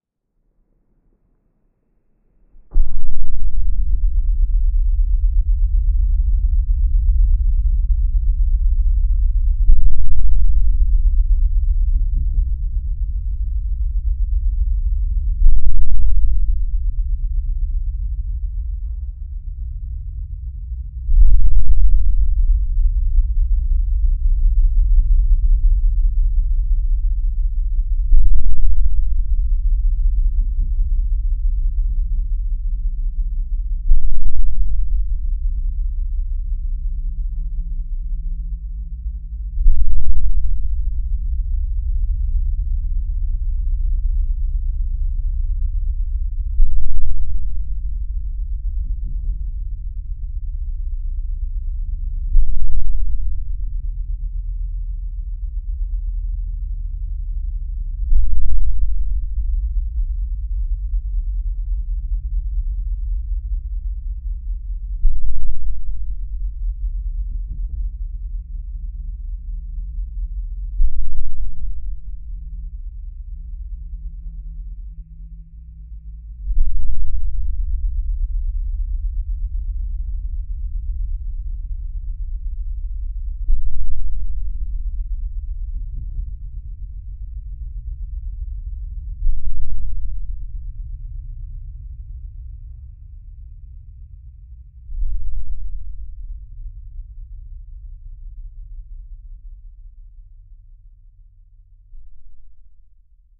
Omnious Stressing ambient noise.
Ambient, Atmosphere, bassy, Creepy, film, Horror, Metallic, Static, Stress, stressing